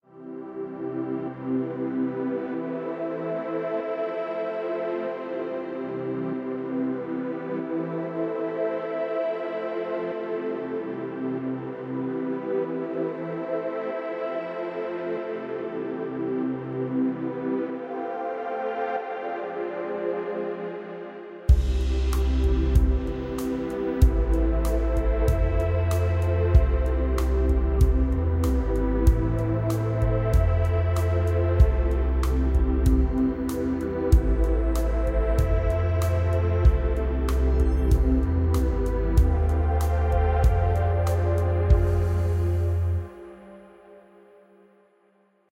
Soft Synth Pad Chord Progression 95 bpm
sequence, flutes, gentle, strings, loop, wind, phase, bass, synth, pad, trance, melody, techno, chords, progression